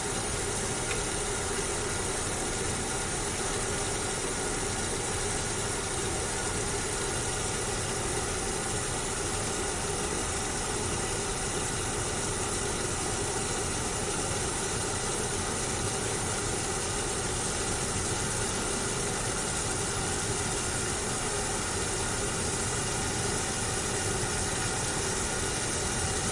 laundromat washers washing machines close rinse2
washers, machines, rinse